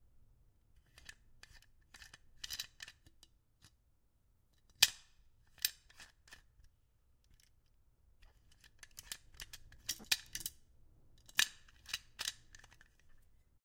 Metal Flask Twisting Open and Closed
a metal flask being twisted open and closed.